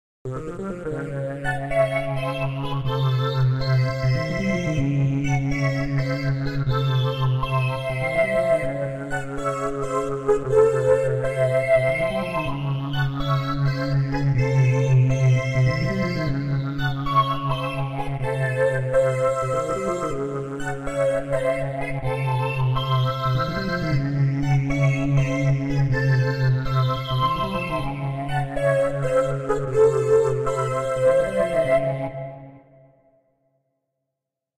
this is a tribute 2 Russian tennis player Yelena Dementieva. Oh my god, she's gorgeous! recorded with Freeware Cheeze Machine in Logic Express..